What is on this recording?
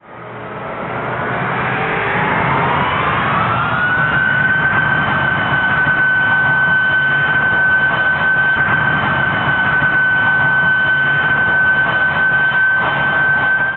14 Revving-up
This covers pages 14-15 of scene 3 (the take-off scene from Manchester) of Ladies Down Under by Amanda Whittington. These are best used with one of the professional cue systems.
aircraft-engine-revving-up, aircraft-engine-revving-up-internal